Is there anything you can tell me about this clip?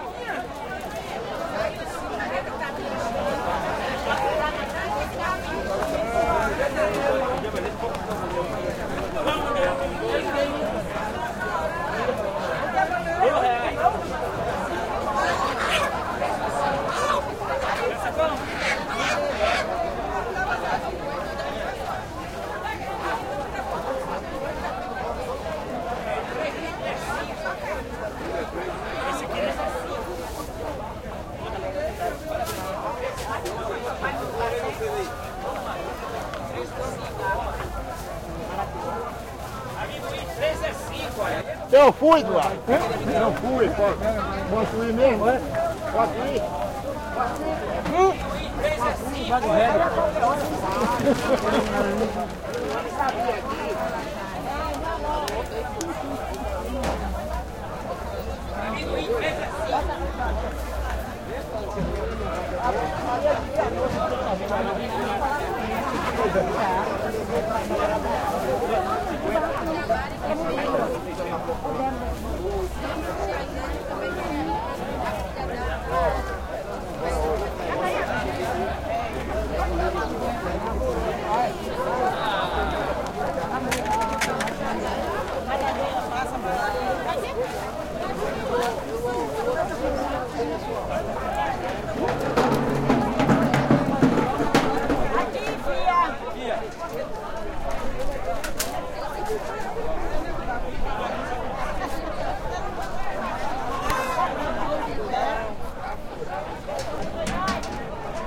Longitude: -38.96429747
Latitude: -12.60137308
Elevação: 9 m
Local: Feira Livre em Frente a Igreja do Remédio
Bairro: Centro
Data: 10\jun\2016
Hora: 09:41 PM
Descrição: Pessoas vendendo e comprando mercadorias
Recorder: Sony D50
Tags (palavras-chave): Cachoeira Feira Livre
Duração: 01:48
Recorded by: Gilmário e Wesley